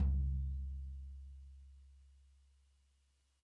Dirty Tony's Tom 16'' 017
This is the Dirty Tony's Tom 16''. He recorded it at Johnny's studio, the only studio with a hole in the wall! It has been recorded with four mics, and this is the mix of all!
16, dirty, drum, drumset, kit, pack, punk, raw, real, realistic, set, tom, tonys